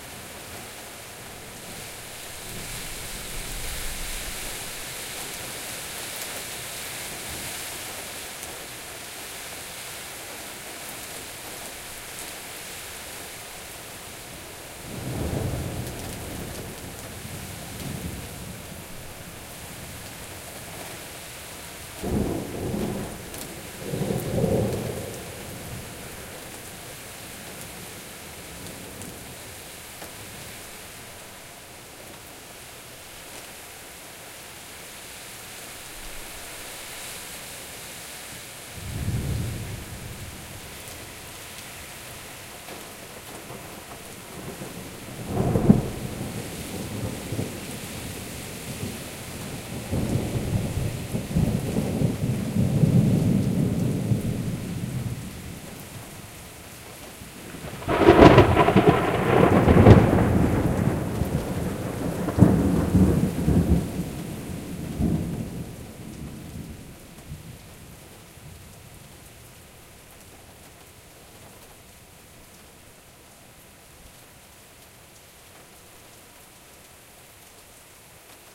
1:18 of going away storm with few far strikes at the end.